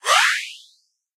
Transition,action,jump,pitch-shift,short,shimmer,whoosh,positive r8bp
I created this sound to create an anime like effect with a shimmering like quality. I used FL Studio and some pith and reverb plugins to get this effect.